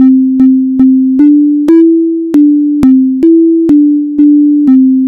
"Au Clair de la Lune"